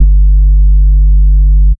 SUB BASS 0102
SUB BASS SUBBASS